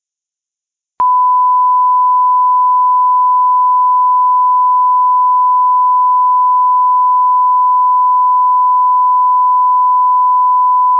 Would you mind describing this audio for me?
Test tone 1kHz-6-16k
1 sec. silence followed by 10 sec. of 1 kHz tone at -6 dBFS. Format is 16 bit signed PCM 16 kHz.